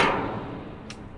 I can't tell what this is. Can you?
Recorded with a Sony MD recorder and an AKG microphone. A stick thrown at an empty train car.
car, echo, field, hit, md, recording, reverb, thunderous, train